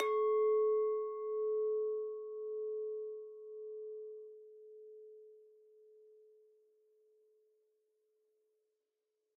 Just listen to the beautiful pure sounds of those glasses :3
clink, pure, wein, soft